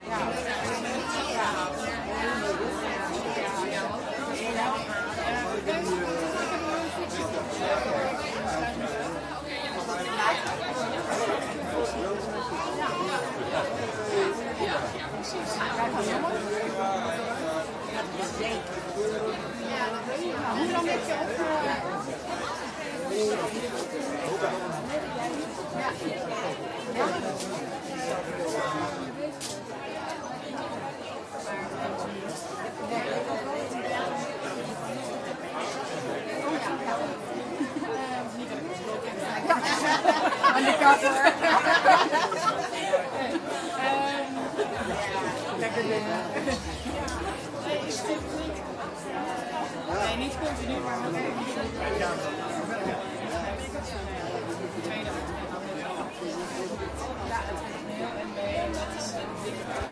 large dutch crowd external walla
Zoom h4n X/Y stereo recording of Dutch crowd talking (external).
background-sound, outside, talking, background, ambience, ambiance, people, dutch, field-recording, atmosphere, ambient, netherlands, holland, general-noise, external, atmos, soundscape, walla